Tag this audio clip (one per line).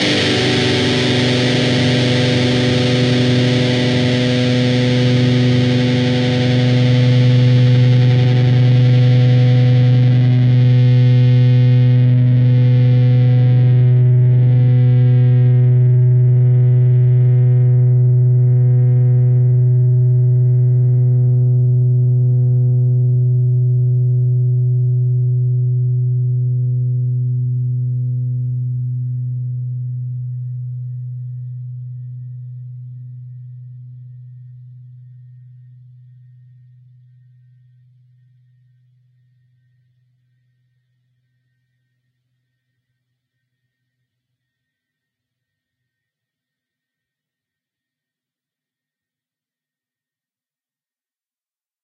chords
distorted
distorted-guitar
distortion
guitar
guitar-chords
rhythm
rhythm-guitar